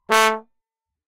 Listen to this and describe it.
One-shot from Versilian Studios Chamber Orchestra 2: Community Edition sampling project.
Instrument family: Brass
Instrument: OldTrombone
Articulation: short
Note: A2
Midi note: 46
Room type: Band Rehearsal Space
Microphone: 2x SM-57 spaced pair
multisample, short, midi-note-46, vsco-2, a2, brass, single-note, oldtrombone